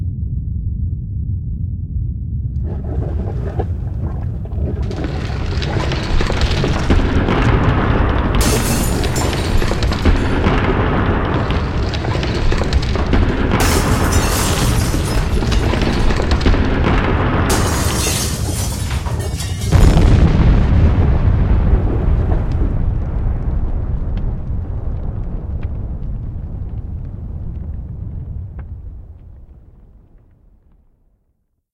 A custom sound effect I created for a production of "Nightfall with Edgar Allan Poe."
This sound is intended to serve as the audio of the moment the House of Usher "falls."
collapse, poe, destruction, house